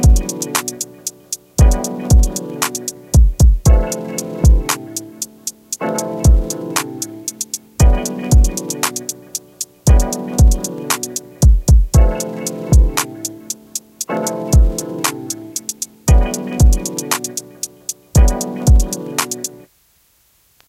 piano hip hop trap loop
Loop made in FL11 with a few piano notes + some drums.
This is my mastering chain test which i am working on for some time now.